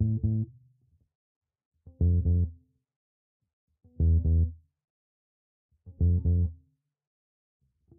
Dark loops 100 bass wet version 2 60 bpm
This sound can be combined with other sounds in the pack. Otherwise, it is well usable up to 60 bpm.
60, 60bpm, bass, bpm, dark, loop, loops, piano